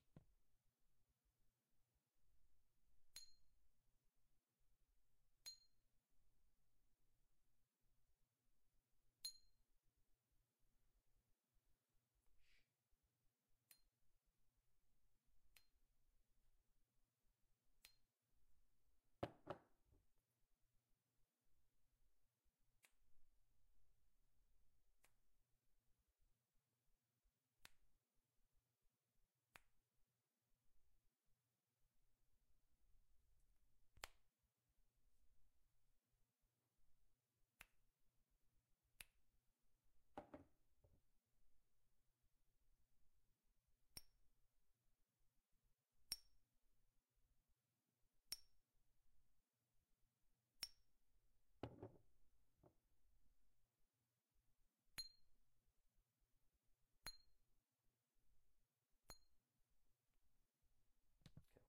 Glass Tap
Flicking a glass container.